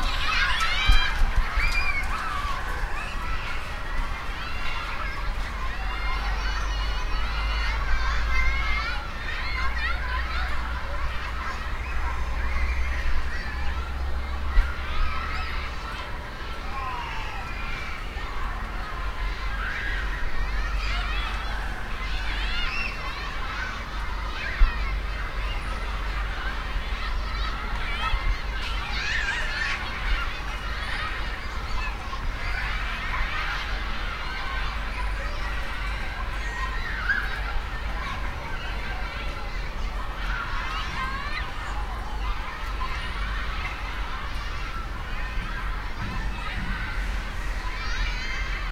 Kids in level 1 (cấp 1) school (year 1 to 5)
Kids play in school level 1 (cấp 1). Use Zoom 1 microphone. 2014.12.04 13:00
school, playground, children, kids, school-yard